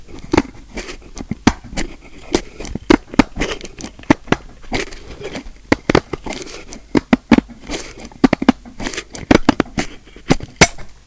Edgar Scissorhand cuts a ordinary letter paper used for printing daily stuff at the office.
crafts, hand, home, office, tools